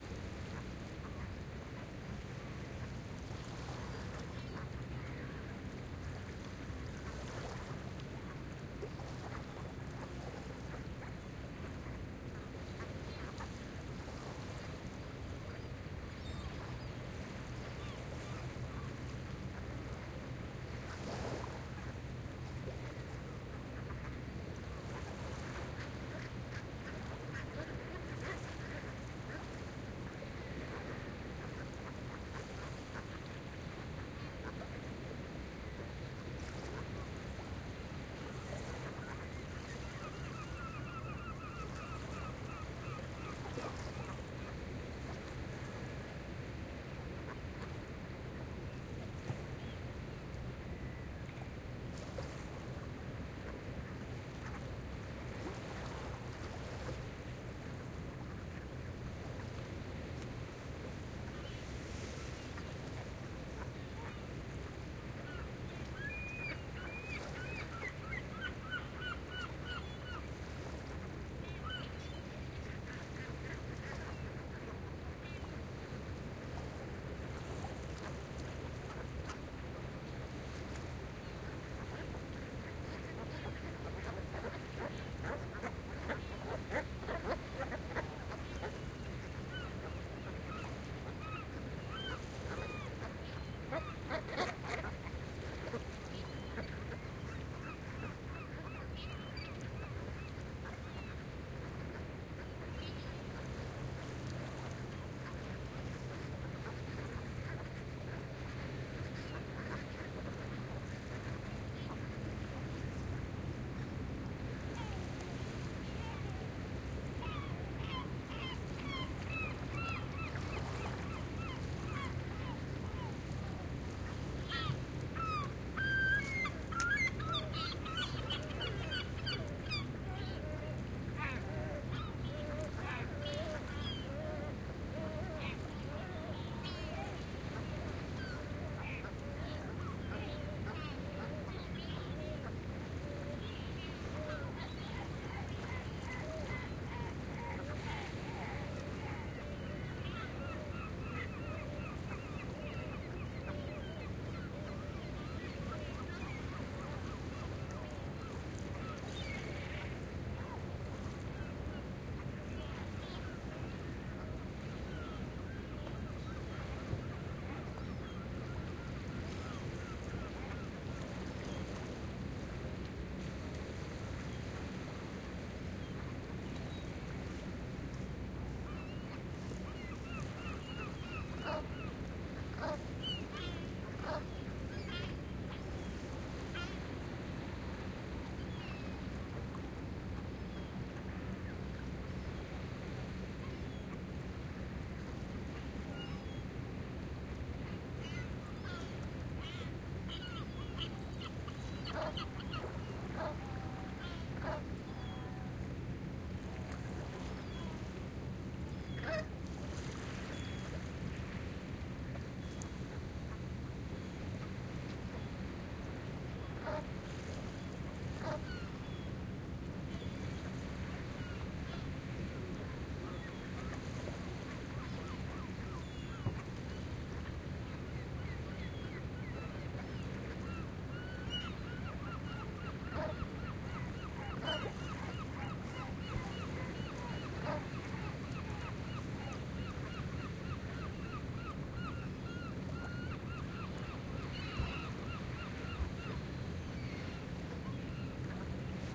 SEA, calm WAVES, SEAGULLS and DUCKS, BOAT motor
Calme sea recording at the "Point de Saire", Jonville, Normandy, in december 2011.
Recording Setup : 2 apex 180 (ORTF setup) in a Fostex FR2le